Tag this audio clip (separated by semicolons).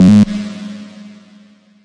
mod; modulation; pitch; reverb; saw